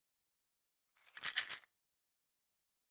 S Shake Pill Bottle
shaking a bottle of pills